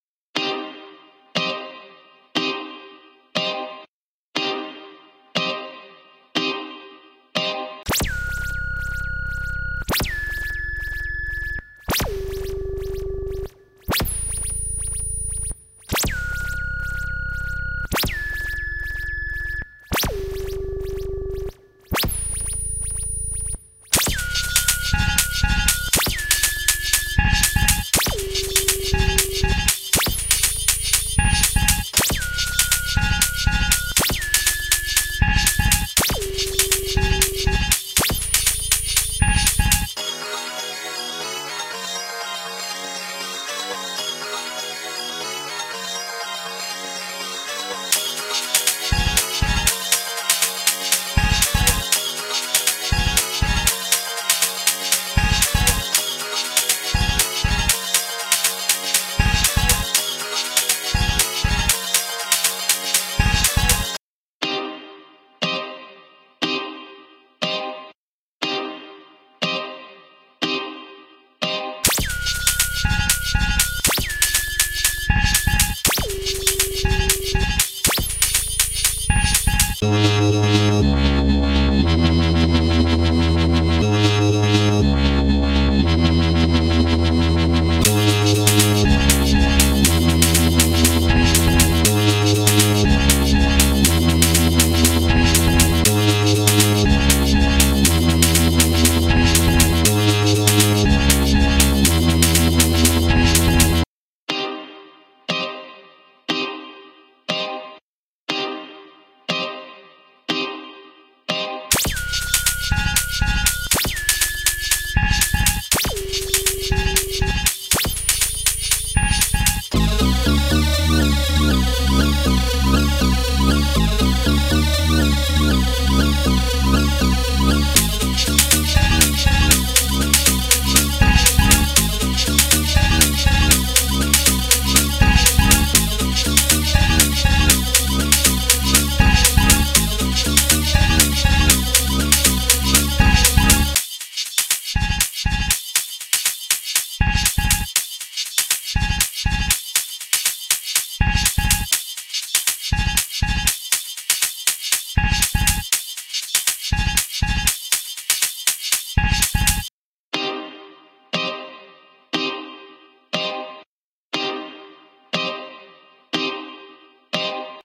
Radio Machine
electronic, machine, noise, radio, robot